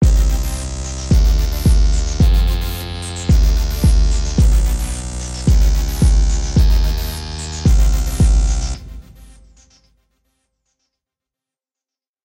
2013 rave 110 bpm 1
1. part of the 2013 rave sample. Rave techno like instrumental loop